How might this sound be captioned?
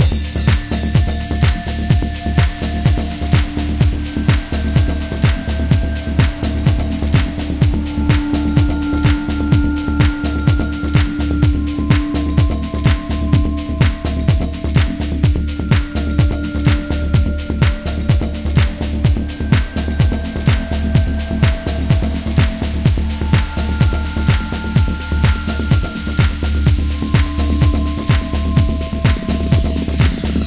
A cozy yet a bit rough stereoloop.
ambient, background, cozy, loop, love, movie